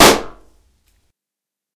Balloon-Burst-01
Balloon popping. Recorded with Zoom H4
balloon, pop, burst